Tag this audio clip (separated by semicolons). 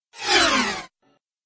effect; fly-by; flyby; free; future; futuristic; fx; metal; metallic; move; movement; moving; noise; science-fiction; scifi; sf; sfx; sound; sound-design; sounddesign; swoosh; whoosh; zoom